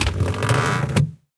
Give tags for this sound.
Cooler
Kit

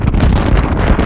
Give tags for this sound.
storm
wind
windy

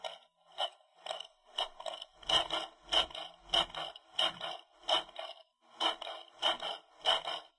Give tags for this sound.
hand-drill
machine
mechanical
sound-effects
tools

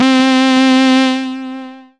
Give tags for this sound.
basic-waveform
saw

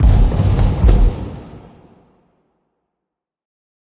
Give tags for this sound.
firework
inception
film
delphi
explosion
bang
end
thunder
musical
hits

